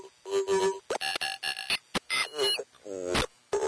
Speak and Read Hit 1
This
is a speak and read, you will probably come across 1000 of them in your
life time. When i put my patchbay on the speak and math I'll upload
more.....
before, bending, has